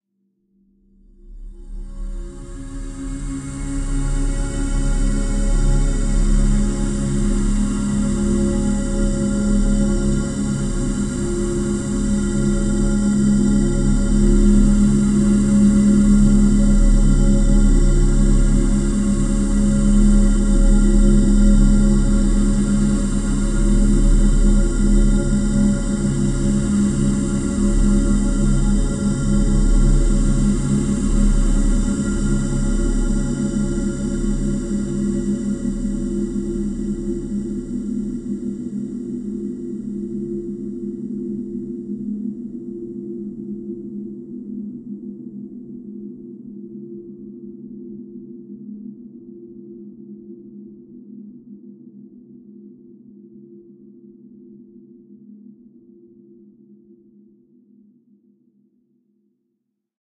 LAYERS 021 - N-Dimensional Parallel Space-38
LAYERS 021 - N-Dimensional Parallel Space is an extensive multisample packages where all the keys of the keyboard were sampled totalling 128 samples. Also normalisation was applied to each sample. I layered the following: a pad from NI Absynth, a high frequency resonance from NI FM8, a soundscape from NI Kontakt and a synth from Camel Alchemy. All sounds were self created and convoluted in several way (separately and mixed down). The result is a cinematic soundscape from out space. Very suitable for soundtracks or installations.